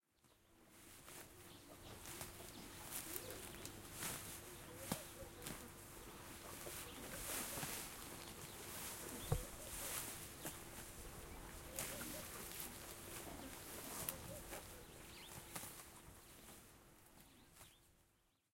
A group of cattle grazing in a field on a farm in South Africa.
Recorder used: Zoom H4N Pro.